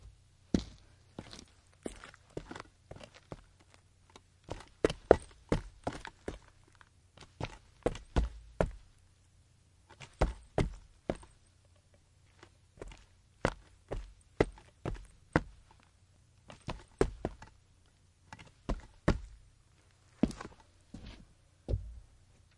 concrete footsteps 2
walking on concrete
concrete, concrete-footsteps, footstep, footsteps, step, steps, walk, walking